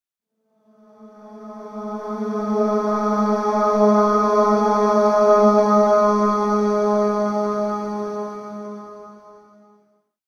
Melancholy Choir
Pad sound with a light, indifferent vocal quality.
pad, dark, ambient, dirge, soundscape, choir, vocal